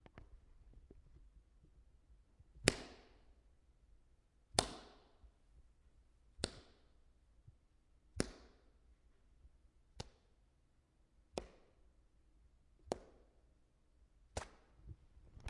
Bamboo Thwack
Me hitting my leg with a bamboo rod.
impact, bamboo, smack